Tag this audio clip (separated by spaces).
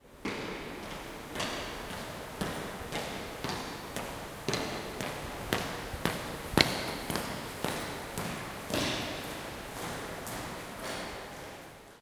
campus-upf crossing footsteps hallway panorama reverb UPF-CS14